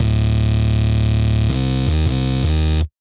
80 Grimey Takka Bass 03
basslines, dist, drillnbass, free, grimey, guitar, hiphop, lofi, loop